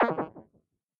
Loose/Deny Casual 2
a quick 'you loose' sound with a no-no feel... part 2